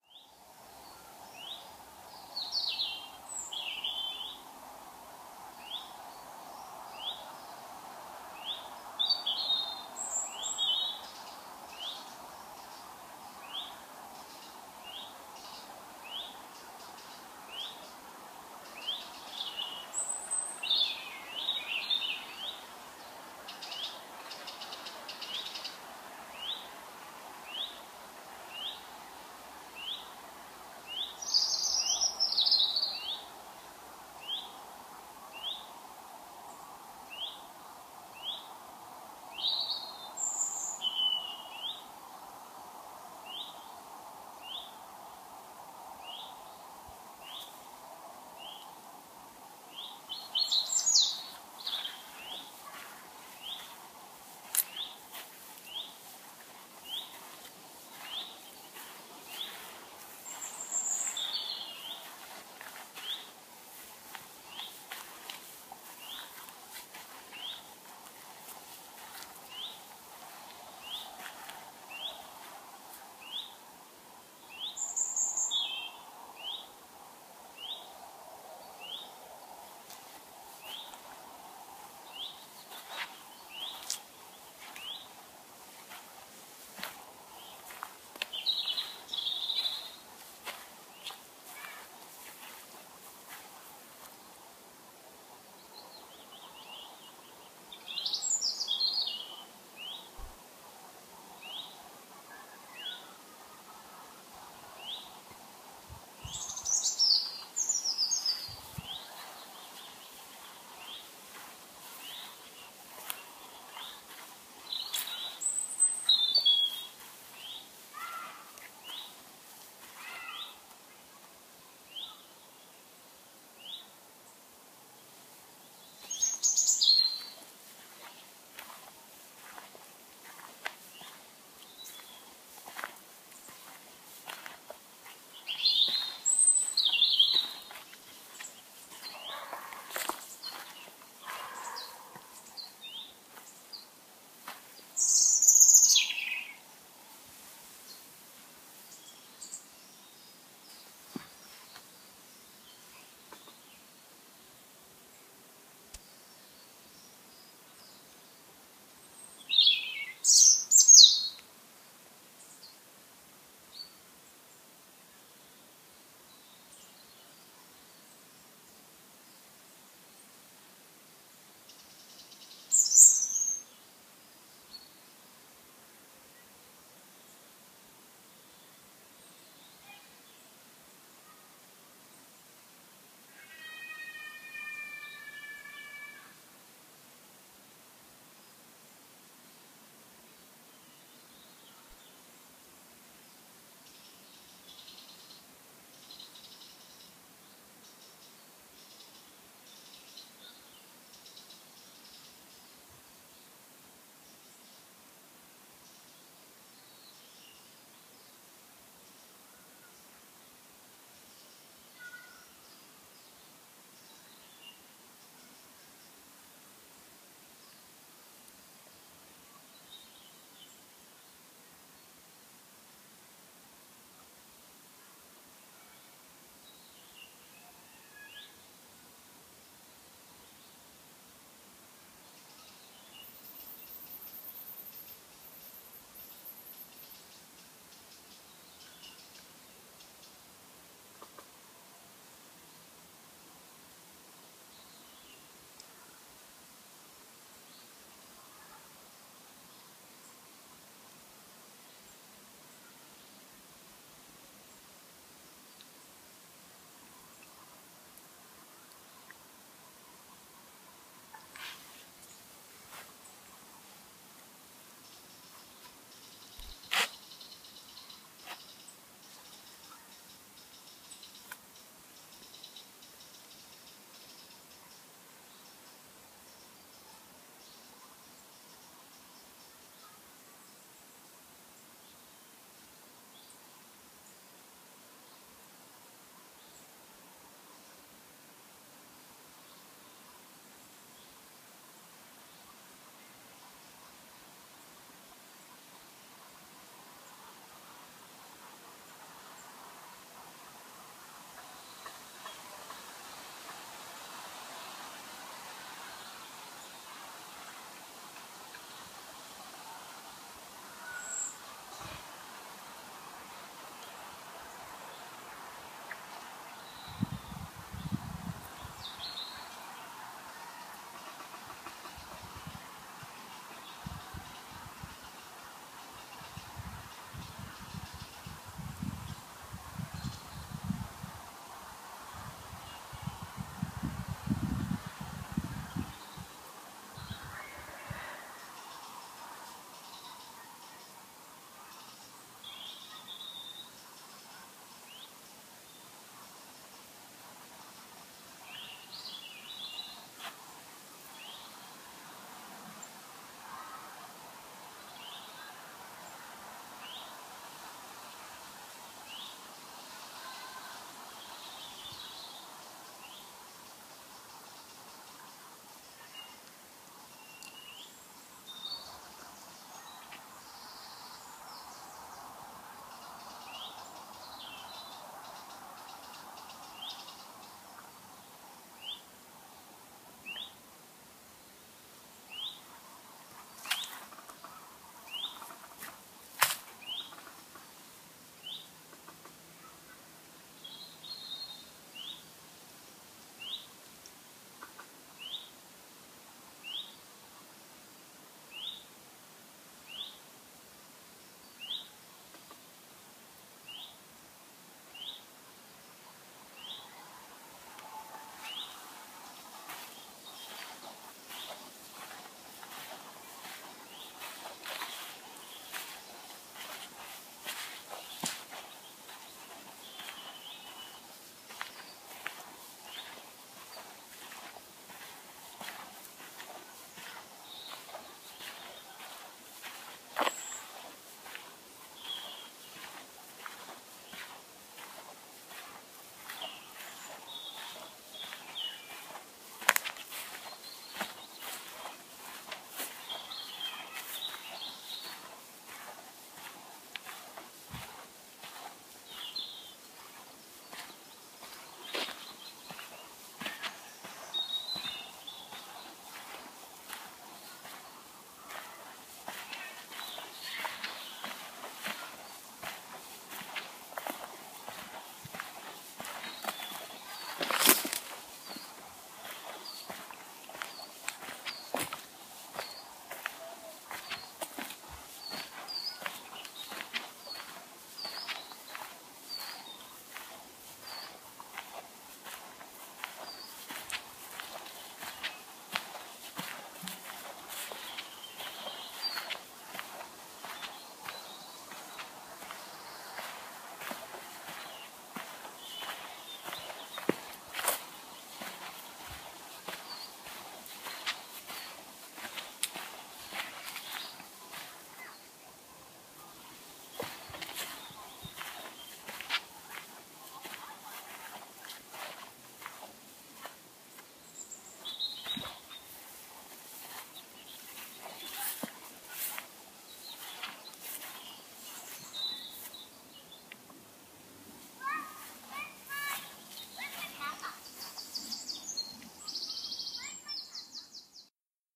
Long (ish) recording of forest ambience and a couple of footsteps. Clumber Park, Nottinghamshire 30th May 2015. Recorded with a 5th-gen iPod touch. Edited with Audacity.